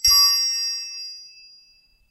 sweden ball 2
It is a key ring sounds like a bell.
ring, bell